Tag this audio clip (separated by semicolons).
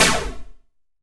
laser; cartoon; gun; arcade; retro; nintendo; video-game; game; games; shoot; beam; 8bit; videogame; spaceship; shot; shooting; weapon